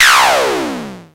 sfx-fuzz-sweep-3
Made with a KORG minilogue
fx, game, synthesizer, sfx, sound, effect